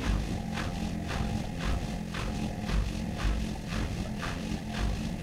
I record washing machine